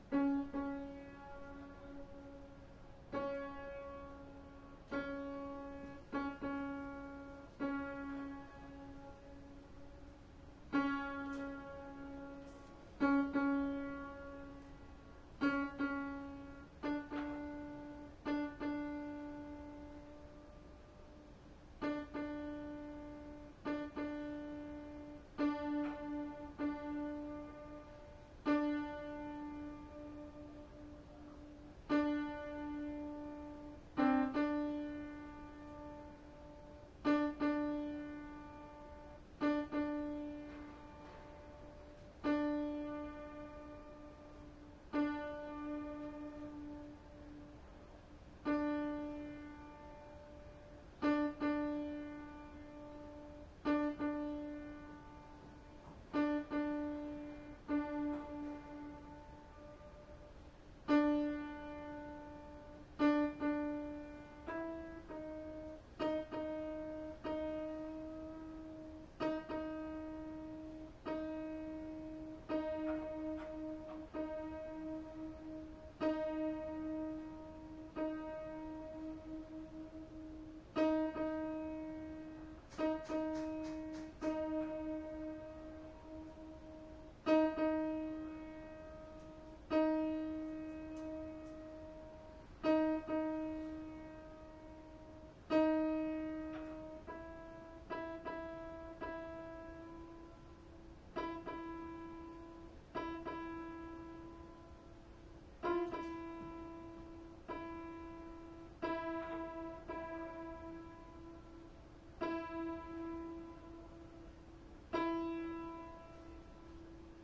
081011 02 tuning piano background noise
piano tuner, first day